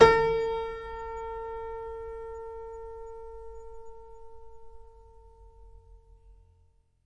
Simple detuned piano sound recorded with Tascam DP008.
Son de piano détuné capté au fantastique Tascam DP008.